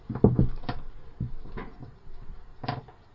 Wooden bench in sauna cracking
cracking, sauna, wood